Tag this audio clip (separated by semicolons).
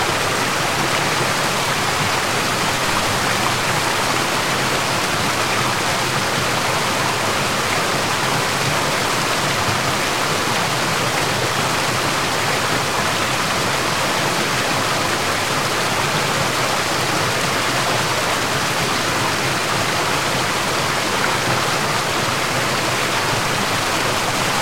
loop; stream; relaxation; river; dribble; water; flow; noise; ambient; field-recording; relaxing; trickle